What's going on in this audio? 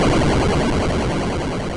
Boss death sound effect [loop] - for games.
- Well, this is just one of several totally
random melodies created by me.
- I created it at sony vegas, putting together several
pieces of sounds until it became a melody.
- I did this in order to help people create simple
games without having to pay for sounds.
- It is completely free and you can
- But if it helps you in any way, you can make a small
boss, death, effect, free, sfx, sound